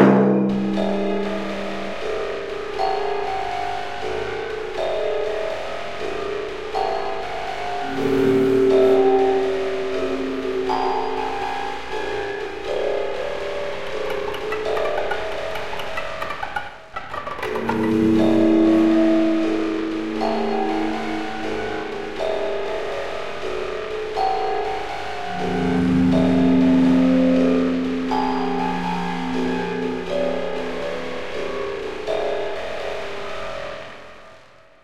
V3-1a Bars 60 to 78
A seamless loop from my collab entry for Dare 32 while it is still work-in-progress.
This is a collab with user Luckylittleraven.
Created in Ableton Live using the following sounds:
- From Thalamus sample library
Clicks & Keys_Clavicordio - String effect 9
loopable, jaw-harp